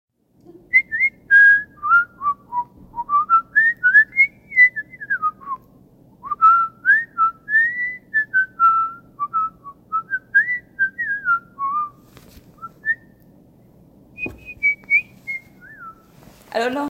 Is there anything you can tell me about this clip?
Anja whistle
My friend whistling
girl, high-pitch, light, whistle